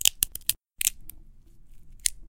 Multiple Bones Cracking
crack a lack clickity clack dack it's the sound of bones crunching!
or chips being eaten. or just some plain boring regular branches snapping, because that's how the sound was made anyway
no bones were harmed in the process of this recording